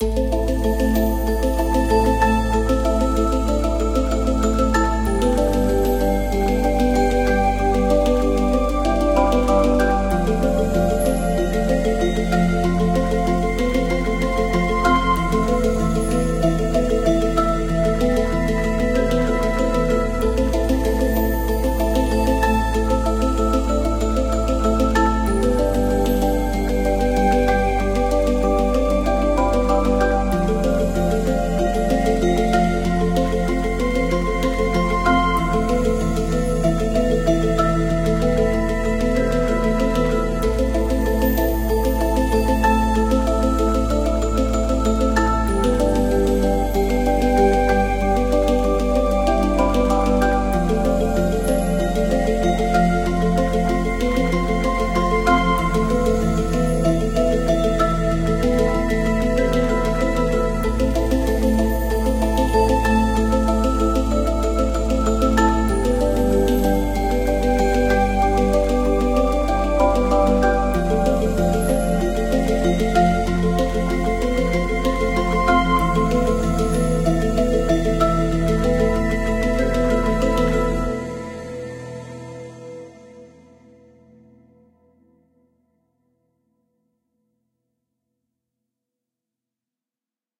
Backround Soundtrack
ambience, sentimental, zimmer, atmosphere, background-sound, hans, background, atmos, license, ambient, ambiance, music, atmo, misterbates, soundscape, calm, free